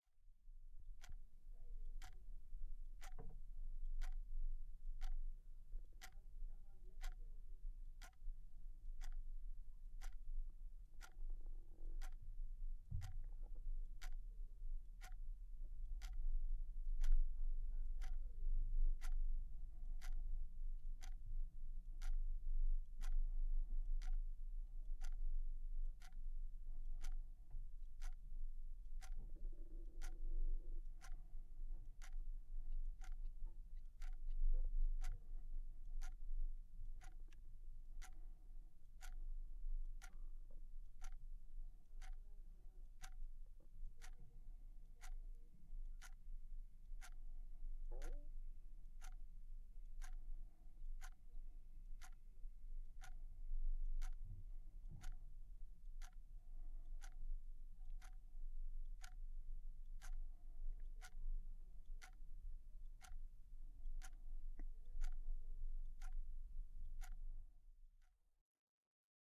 Small Clock Ticking
A small analog alarm clock ticking for around a minute.
Recorded with a RØDE NT-2A.